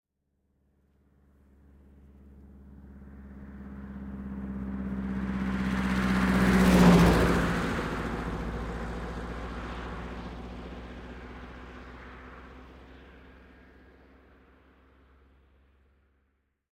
This sound effect was recorded with high quality sound equipment and comes from a sound library called Cars In Motion which is pack of 600 high quality audio files with a total length of 379 minutes. In this library you'll find external passes of 14 different cars recorded in different configurations + many more single files.